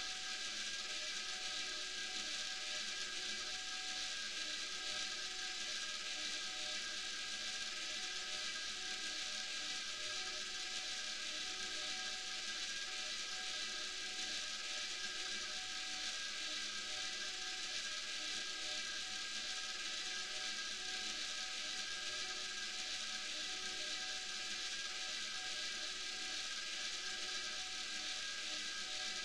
Friction - Grinding - Looped
Friction loop made from feedbacking the tails of a looped sample.